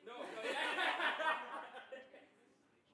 Crowd Laugh
A short laugh of several people. Could be used as a laugh track.
sitcom, crowd, laugh, audience, laughtrack